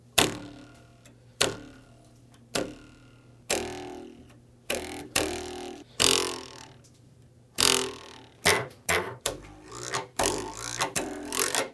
This is that weird buzzing noise that is made by thumbing a piece of plastic silverware over the edge of a table. In this case it was a knife, but it honestly, all the plasticware makes the same noise The increased pitch on some of this is from moving the knife while it vibrated.